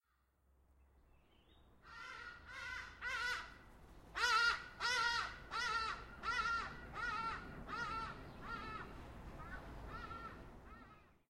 This is a recording of a Hadidah fleeing from the cat next door. Poor thing.
scared, bird, chased, cat, wing, fly